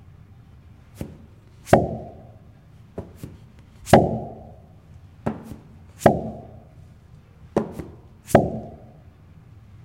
Recording of a plunger against the floor .
bathroom, suction